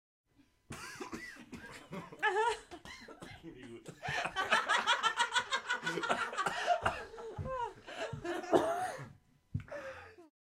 group laughing one choking
group of people laughing, one of them seems to be choking, while laughing, extra fun (?)Recorded with 2 different microphones (sm 58 and behringer b1) via an MBox giving a typical stereo feel.
folio, laughing, laughter